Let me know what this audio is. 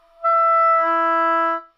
Oboe - E4 - bad-attack-air
Part of the Good-sounds dataset of monophonic instrumental sounds.
instrument::oboe
note::E
octave::4
midi note::52
good-sounds-id::8026
Intentionally played as an example of bad-attack-air
multisample, oboe